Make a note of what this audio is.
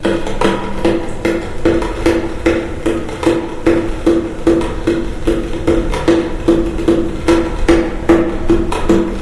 Water dripping inside a metal rain gutter.
drops, rain, rain-gutter, water, water-drops